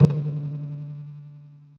some processed tone...